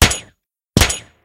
Layered sound made from door mechanisms, handclap and whistle. Recorded with AKG 2006 mixed in Audacity.